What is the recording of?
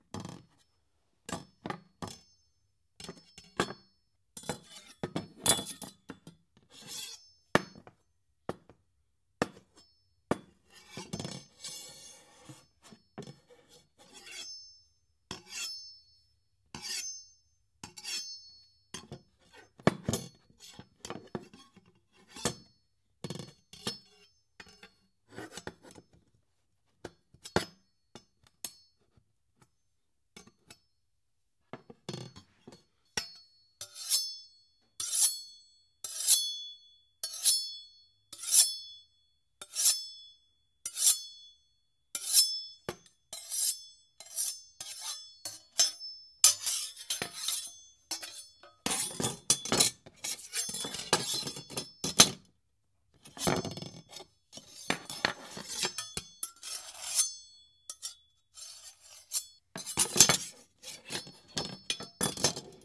Indoor Kitchen Knife Scrape Clang Zing Various
Kitchen knife sounds. Scraping, clanging, etc.